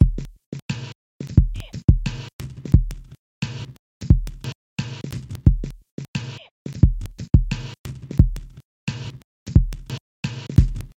Chai Tea Drums
A sputtery drum beat. The hi-hats are from vinyl crackles and mistakes in other recordings, made to sound like the crackles themselves are making the music. 88 beats per minute.
hip-hop, lofi, chops, low, drum